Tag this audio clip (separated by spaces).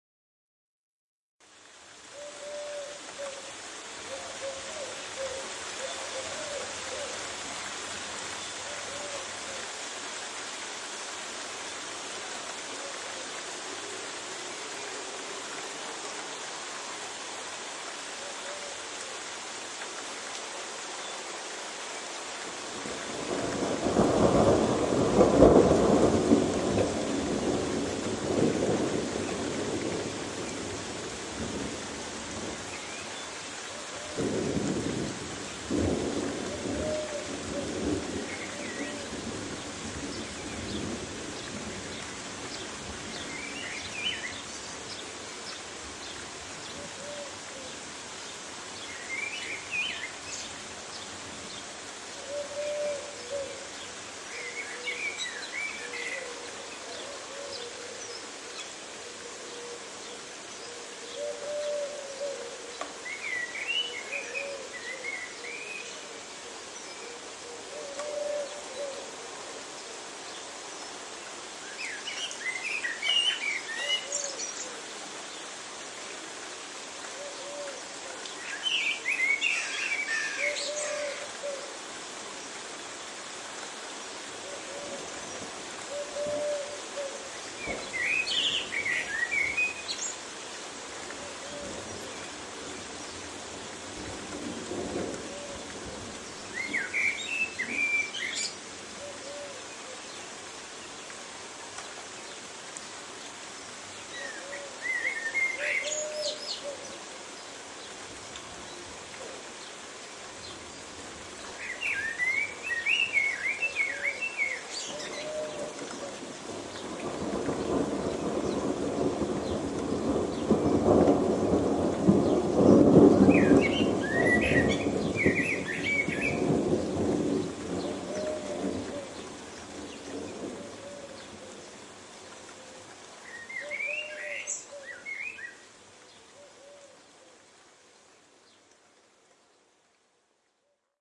Thunder Storm